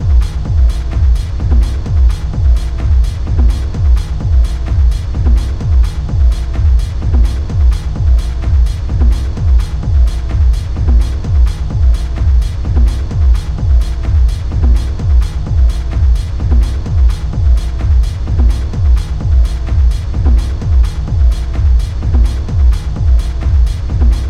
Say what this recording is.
Dark Techno Sound Design 03
Dark Techno Sound Design